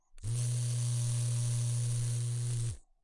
Electric razor 12 - battery driven razorblade short
A recording of an electric razor (see title for specific type of razor).
Recorded on july 19th 2018 with a RØDE NT2-A.
beard, electric, electricrazor, hygiene, Razor, razorblade, shave, shaven, shaver, shaving